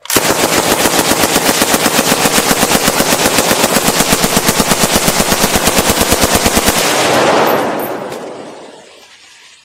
Authentic M60 Firing
This is a real M60 machine gun being fired.
Fire, Gun, Gunshot, Machine, Shot